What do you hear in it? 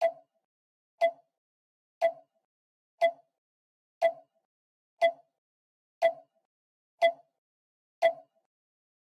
digital/modern 8 seconds timer - by Damir Glibanovic